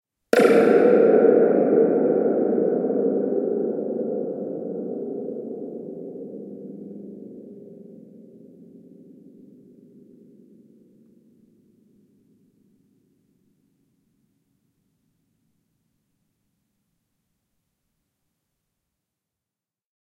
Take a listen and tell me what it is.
Thunder Tube, Large, Hit, A (H4n)
Raw audio of striking a large thunder tube with a metal mallet. The coil was left dangling in the air. Recorded simultaneously with the Zoom H1, Zoom H4n Pro, and the Zoom H6 (XY capsule) to compare the quality.
An example of how you might credit is by putting this in the description/credits:
The sound was recorded using a "H4n Pro Zoom recorder" on 11th November 2017.
Coil, Large, Cable